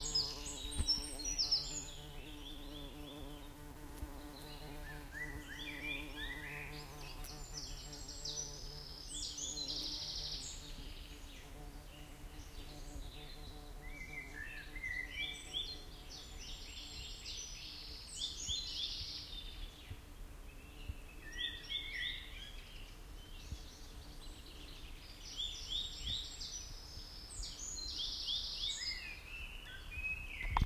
Birdsounds and bumblebee
Recorded with a Zoom H1 recorder in Sablonceaux, France.
You can hear a bumblebee and birds in the background.